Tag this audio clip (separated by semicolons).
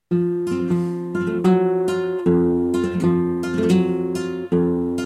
guitar; flamenco; acoustic; classic